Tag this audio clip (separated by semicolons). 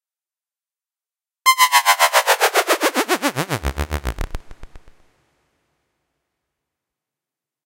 broadcast; chord; deejay; dj; drop; dub-step; effect; electronic; fall; fx; imaging; instrument; instrumental; interlude; intro; jingle; loop; mix; music; noise; podcast; radio; radioplay; riser; send; sfx; slam; soundeffect; stereo; trailer